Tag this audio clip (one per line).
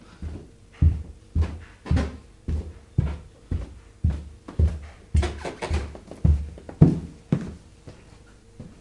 stairs
walking